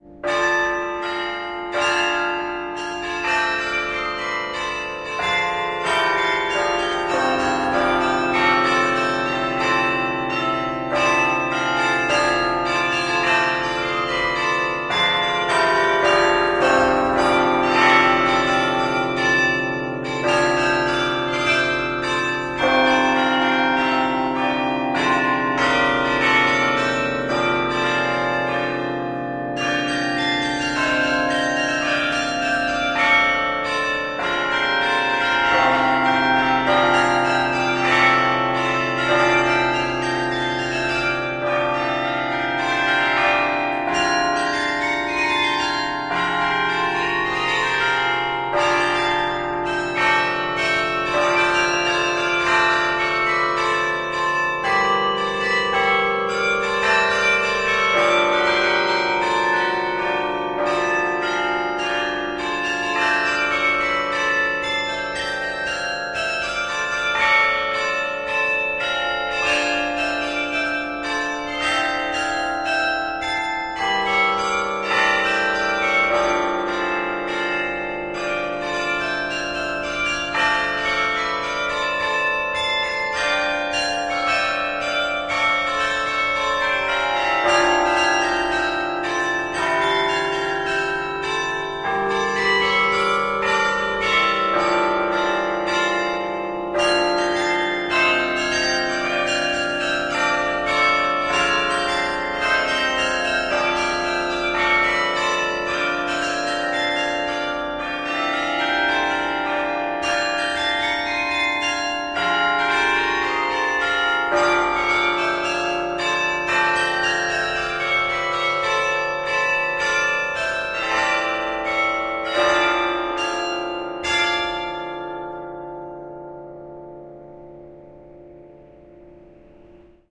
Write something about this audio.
Carillon Gorinchem The Netherlands